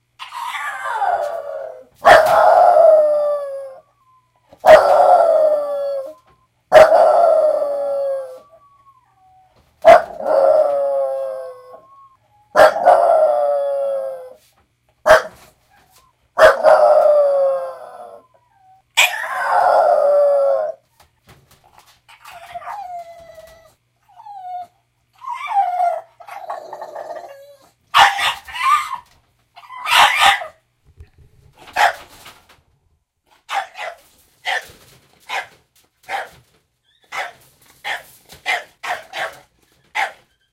Bulldog Howl Edited
Our English Bulldog crying, barking, and howling. She was throwing a fit because she wanted to go for a walk. This is the edited concise version with the extended periods of quiet whining and silence edited out. This version offers a consistent barking and howling experience.
bark, barking, bull-dog, bulldog, cry, dog, dogs, fuss, howl, whine, whining